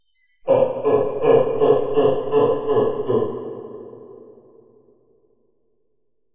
A new monster laugh 2012!!!! I'm back officially!